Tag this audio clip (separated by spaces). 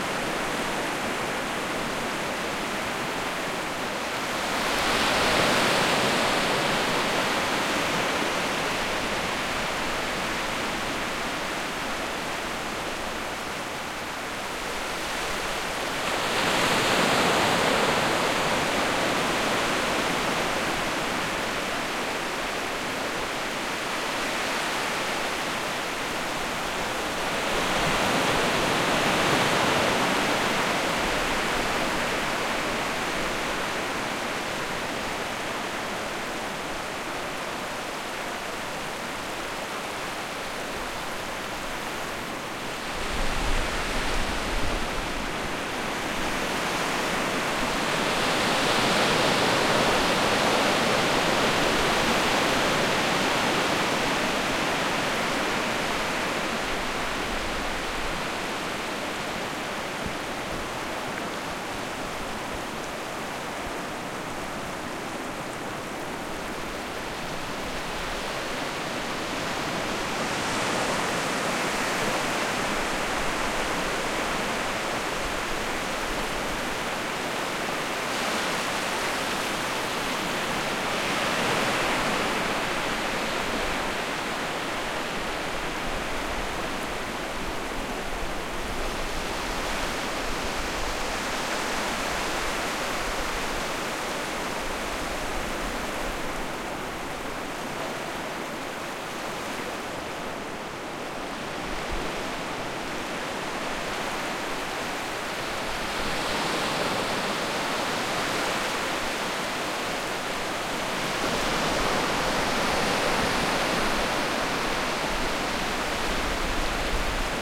field-recording flickr oceansurf scotland waves